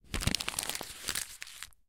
Crumpling a piece of paper in my hand once.